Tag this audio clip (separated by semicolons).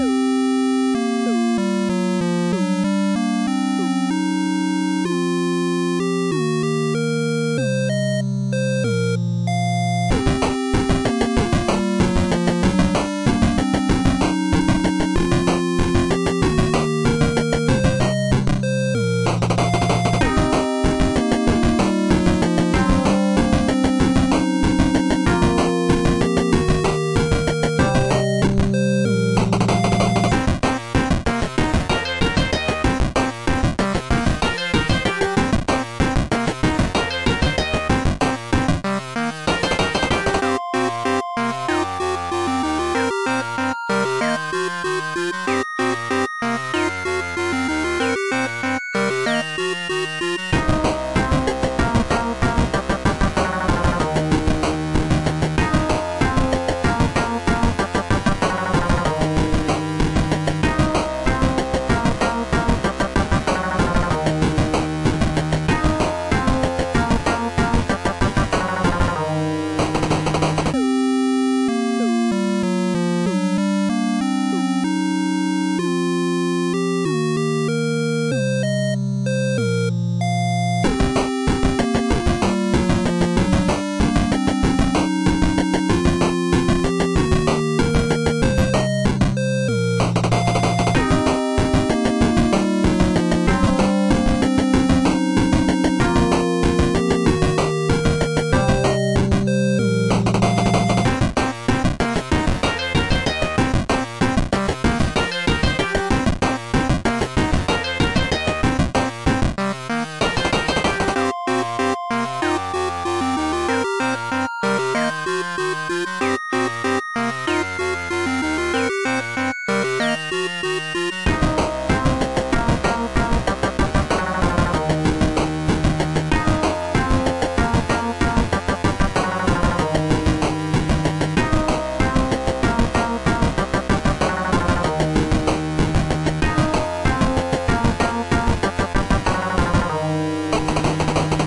8bit; arcade; atmosphere; background; chiptune; electro; electronic; game; loop; melody; music; retro; rhythmic; sample; soundtrack